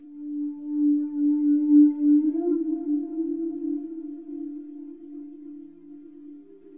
An almost flute-like guitar sample made using the amazing Ebow gizmo with my Epiphone Les Paul guitar through a Marshall amp. Some reverb added.